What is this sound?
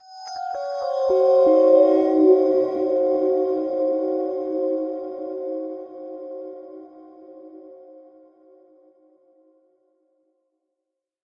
Start Sounds 011
Start Sounds | Free Sound Effects
stars, arcade, indiedb, video, IndieDev, games